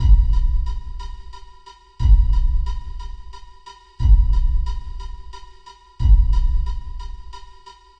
Death Beat 120bpm
A slow industrial sounding beat
Dread, Menacing, Slow